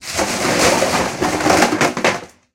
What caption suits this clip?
plastic boxes fall 01
Sound of several plastic boxes dropped making a crashing sound on the floor.
box, boxes, crash, drop, fall, impact, plastic, smash